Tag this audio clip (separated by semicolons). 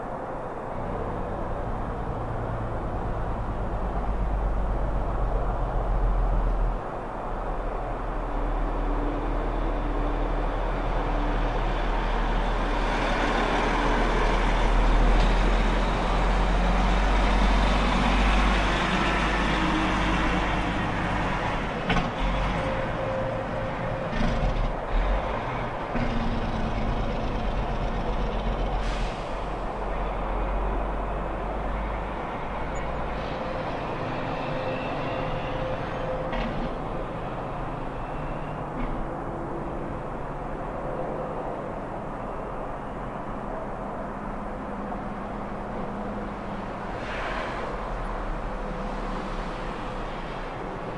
big; truck; exhaust